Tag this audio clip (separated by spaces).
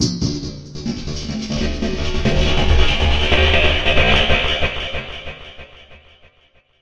Dance Fx Industrial Metallic Processed Psytrance Trance